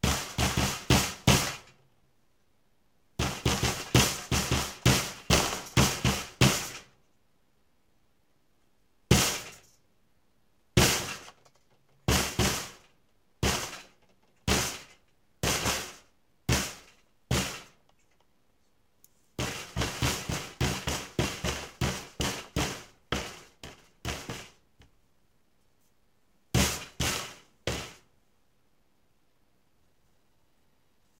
Raw recording of aluminum cans being thrown at a tin heating duct. Mostly high-band sounds. Some occasional banging on a plastic bucket for bass.
crash, collapse, steel, can, 252basics, bang, fall